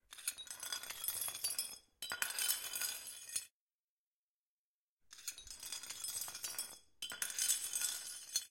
Moving plate shards as if someone was searching under them.
Recorded with:
Zoom H4n op 120° XY Stereo setup
Octava MK-012 ORTF Stereo setup
The recordings are in this order.